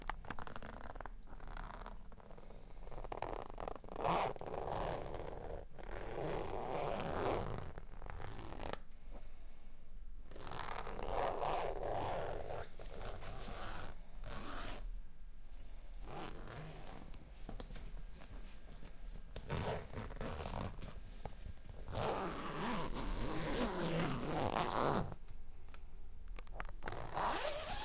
Favourite sound in livingroom of A.